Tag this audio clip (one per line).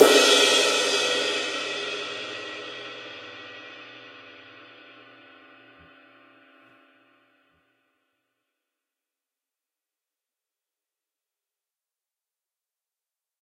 drums
istanbul
percussion
skiba
zildjian